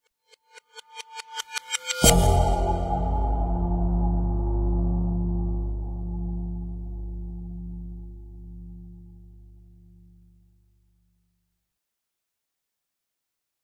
Deep Cympact
cowbell-bounces and cymbal, recorded very close, re-pitched and reversed. added some fx. enjoy.
effect, reverse, sfx